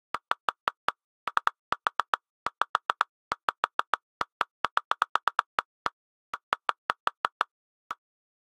My homemade version of the iPhone texting sound. I couldn't find a clean one so I just made one! Plus, it's technically clear from Apple this way ;)
I used Reason's NNXT sampler and plugged in a soft snare sidestick sample, tweaked the resonant bandpass frequency to give it that clacky tone, added a transient shaper to tame the click and bring out the body a bit, then slapped on a limiter.